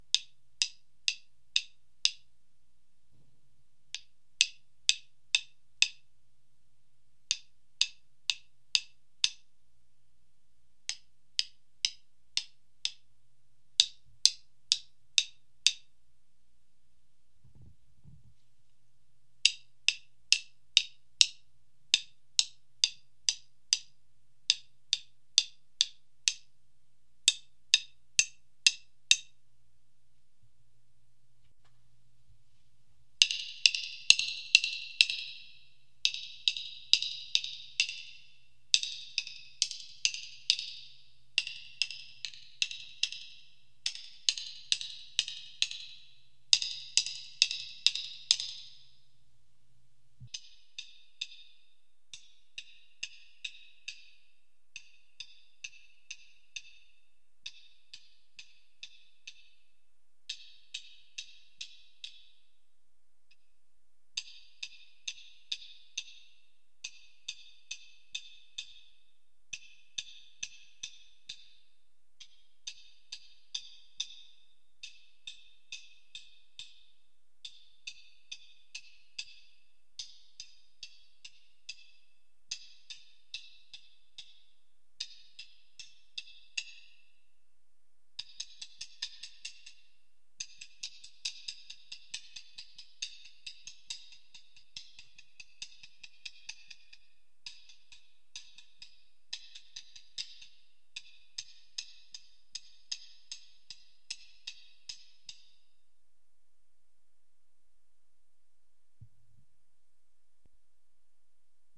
processed reverb drum sticks
Various processed hits from Zildjian drum sticks that came with Rock Band. Recorded through a Digitech RP 100. Various reverb settings.
domain, drum, drum-sticks, processed, public, reverb, stick, sticks